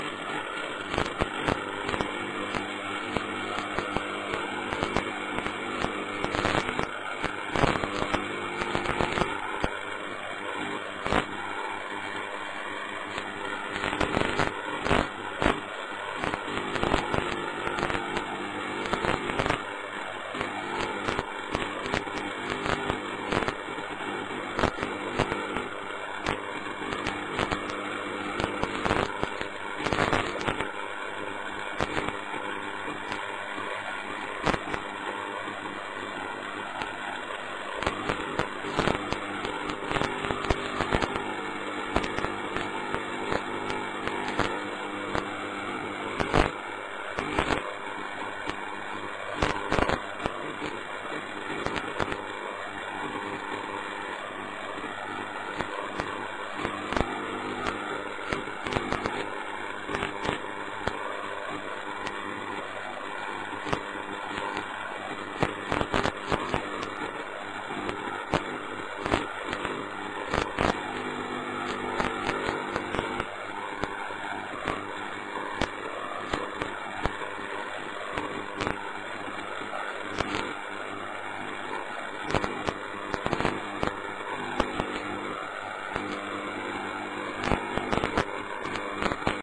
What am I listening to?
A loop of static noises recorded on the AM band.